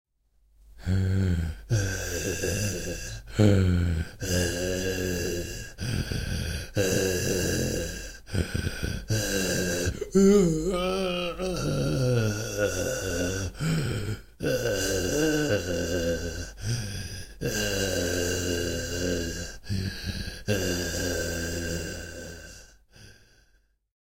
zombie young man lament
The lament of a young man zombie. It includes a moan of pain. Created for my short film Fallen Valkiria. Actor: Juan Carlos Torres.